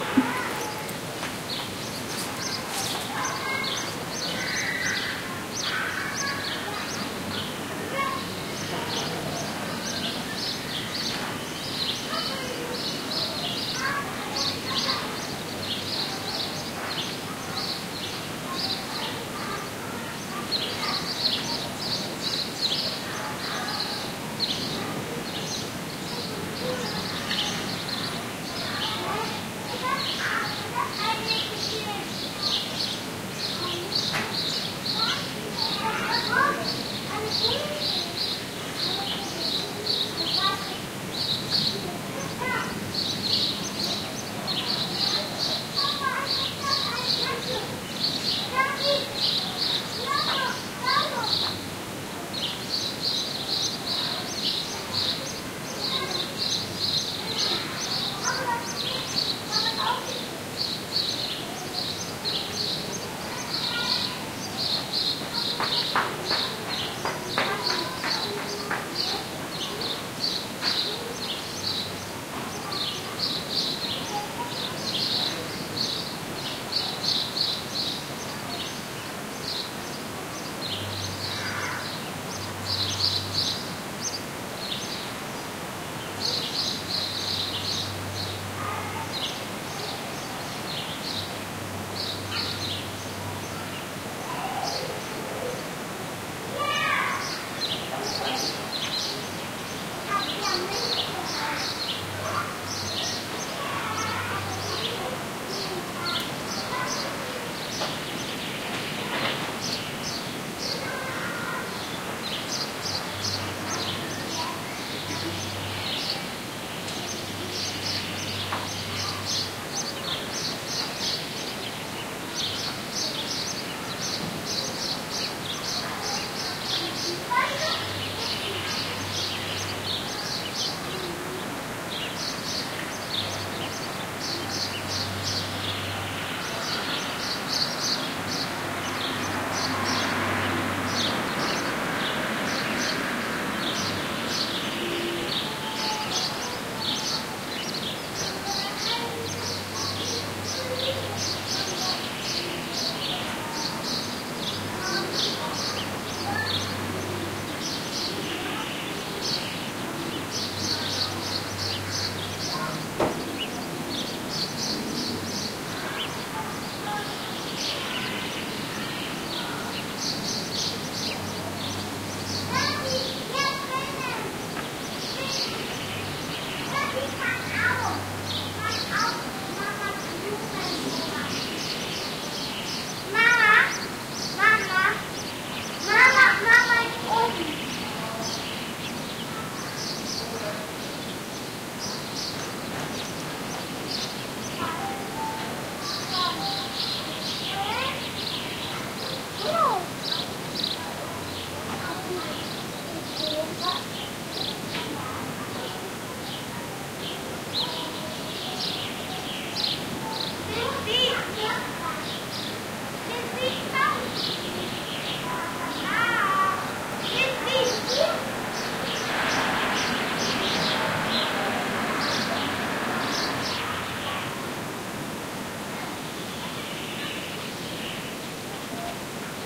High Noon in Suburbia
..the family, the neighbors, their kids and the Easter Bunny.
ambience, Neubaugebiet, Baden-Wuerttemberg, neighborhood, Suburbia, Ostern, banlieue, Eningen, kids, High-Noon, ambiance, gardens, families, suburban, Easter, urban, suburbs, atmosphere, springtime, backyards, Stuttgart, bourgeoise-hood, field-recording, residential